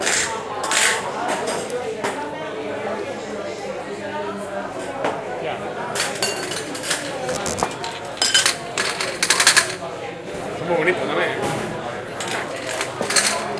noise of glasses being moved, a male voice speaks in Spanish, conversation in background. Edirol R09 internal mics